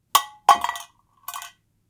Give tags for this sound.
crash soundeffect tin-cup

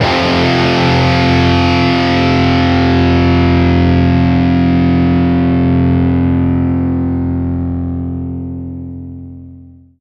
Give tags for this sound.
Distortion Melodic Electric-Guitar

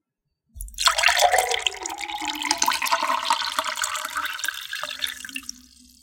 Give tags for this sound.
filling-a-cup liquid-pour pouring-liquid small-splash water-pouring

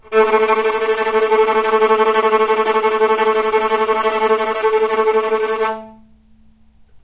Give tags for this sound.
tremolo violin

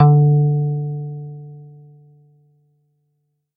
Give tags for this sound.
Kawai-K1-Harp Harp K1-Harp Plucked

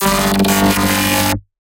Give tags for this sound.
Noise Mechanical Glitch Cursed Glitchy Computer Technology Robot Scream

